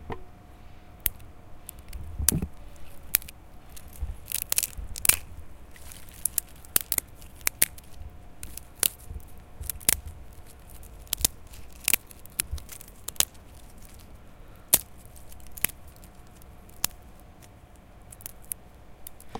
paisaje-sonoro-uem-SATISFACCION Ramas-almu
El sonido de cuando rompes o cortas cachos de muchas ramas pequeñitas, el sonido de las ramas al romperlas puede resultar pacifico, como cualquier sonido de la naturaleza.
broken-sticks, nature, relaxing, satifaction, satisfying, uem, wood